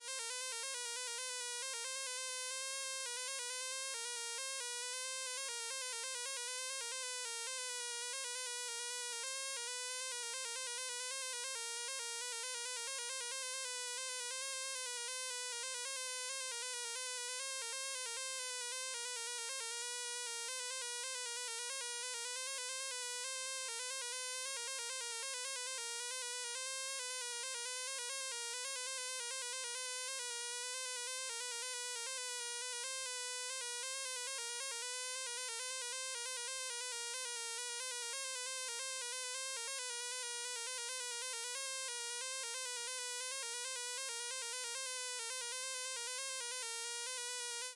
Some experiments with random pitches and adding more and more, creating (very) special atmospheres